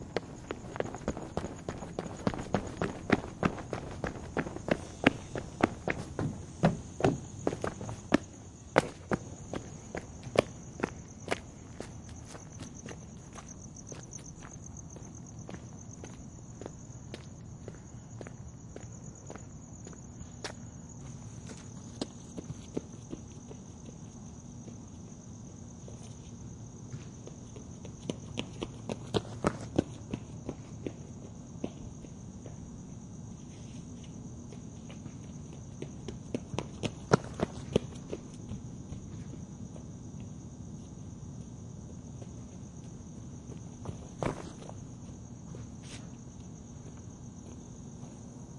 Footsteps Running buzzing light alley park calm night edlarez vsnr
calm night Running Footsteps with rubber shoes buzzing light alley at park edlarez vsnr
buzzing-light, Footsteps, rubber-shoes, light, night, buzzing, alley